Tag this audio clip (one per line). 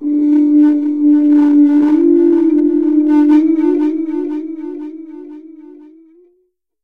flutes
mellow
feedback
native
chill